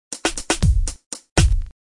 Another beat I made in the application Hydrogen. Hope you like it!
beat, dance, drum, fruity, funky, garage, groovy, hip, hop, loop, percussion, snare, studio